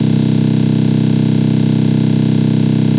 Amen Kick - 32nd Note Triplets
Amen kick fill
idm, roll, kick, fill, skipping, drumroll